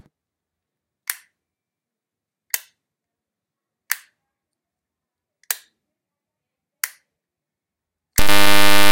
switching on and off
switcher, switching